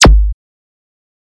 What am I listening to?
Flashcore kick 1
core, flash, kick, flashcore